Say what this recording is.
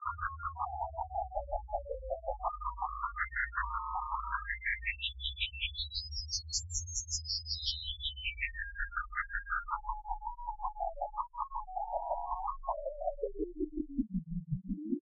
Sequences loops and melodic elements made with image synth. Based on Mayan graphical chart.
sequence, loop, sound, space